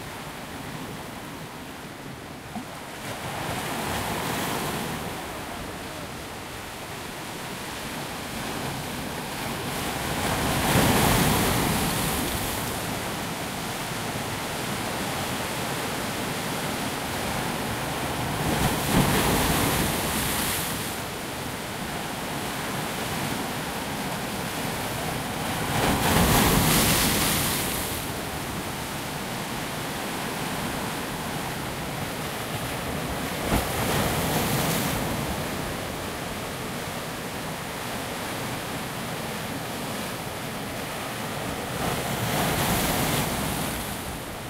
Taken with Zoom H2N, the beaches of Cyprus
beach, coast, ocean, sea, seaside, shore, water, wave, waves